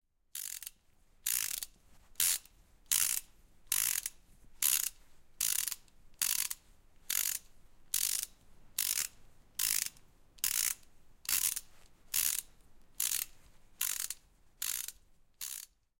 Sound of mechanic rattle